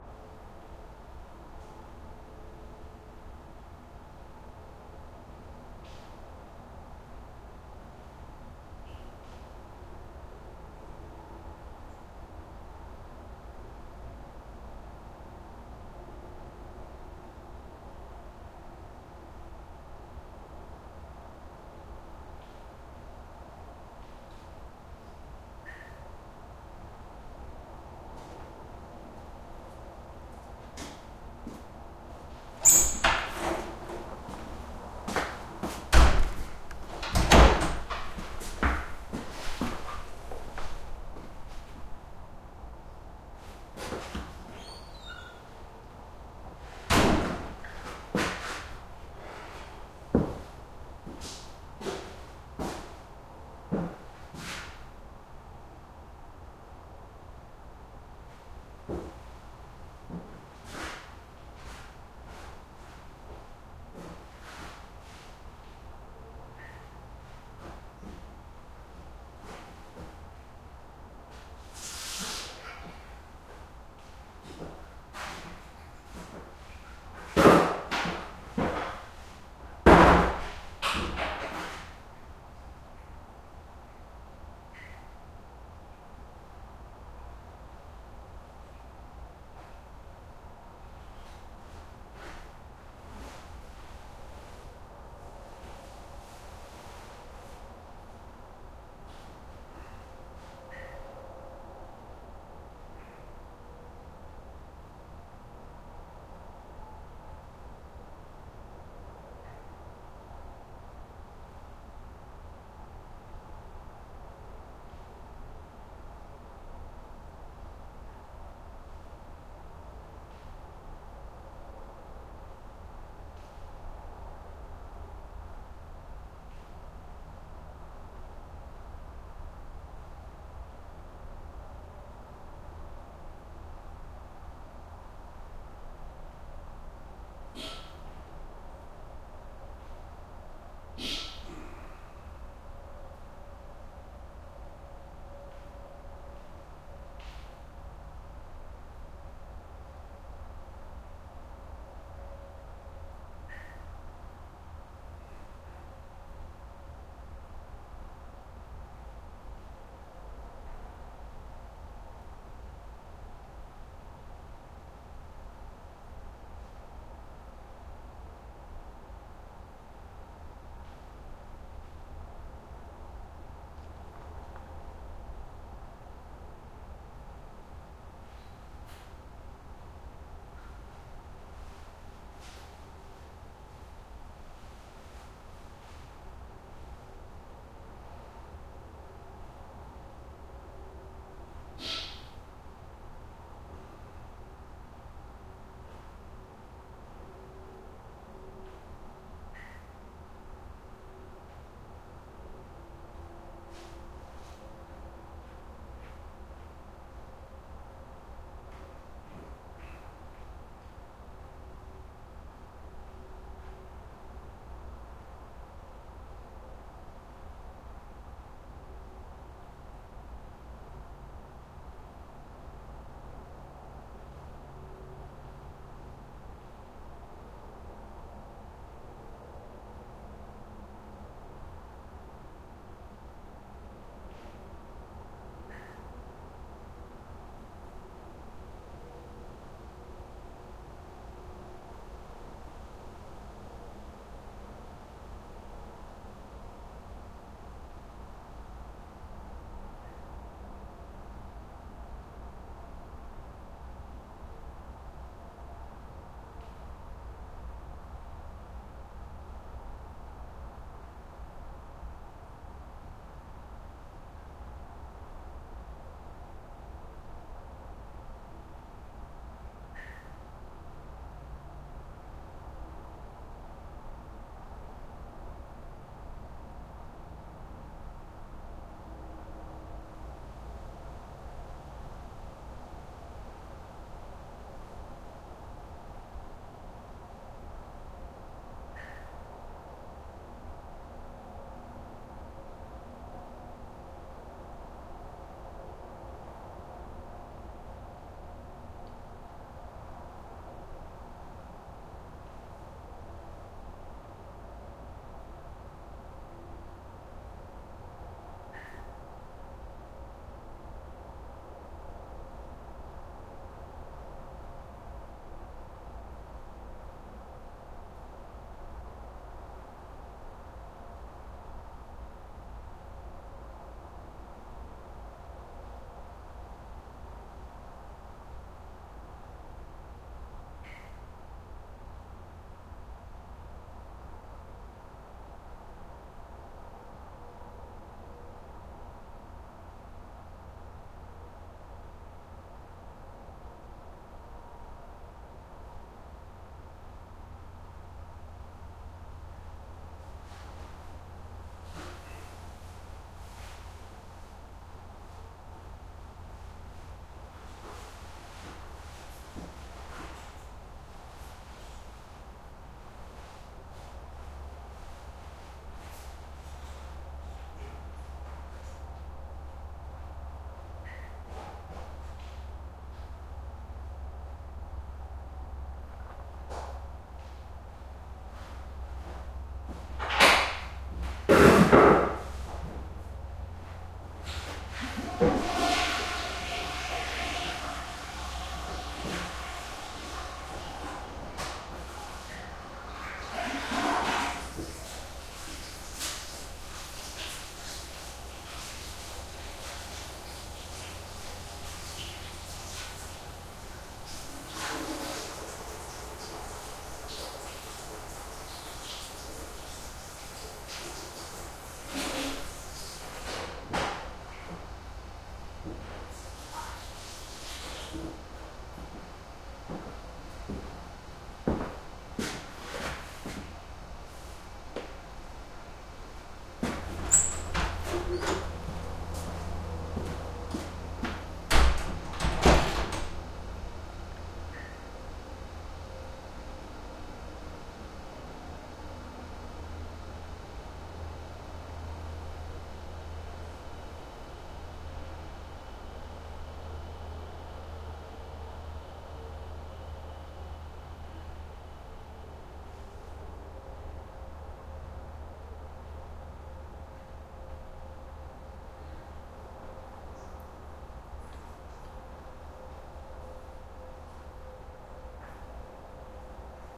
room tone campground bathroom with heavy distant highway +guy comes in, uses toilet and leaves wood stall doors open close roomy
distant, haze, tone, campground, highway, hum, room